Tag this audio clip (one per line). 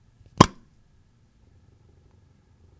Popping
Opening
Lid